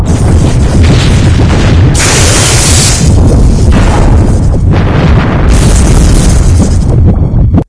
Ship damaged explosions sparks 2
Another version of the exploding damaged engine with sparks. This time, the engine is throbbing horribly.
damage, engine, sci-fi, spaceship, sparks